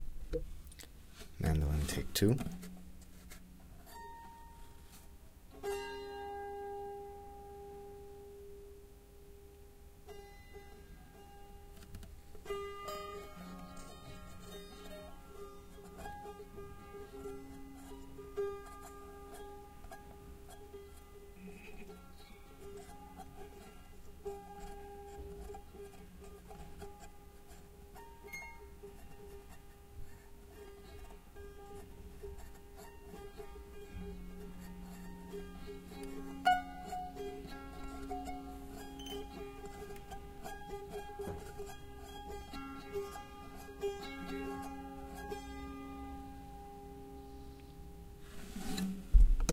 Mandolin Rumors
Zoom 6 recording of the sounds of an out of tune Bolivian mandolin.